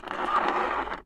I found a busted-up See-N-Say in a thrift shop in LA. The trigger doesn't work, but the arrow spins just fine and makes a weird sound. Here are a bunch of them!
recorded on 28 July 2010 with a Zoom H4. No processing, no EQ, no nothing!
glitch, mattel, see-n-say, static, toy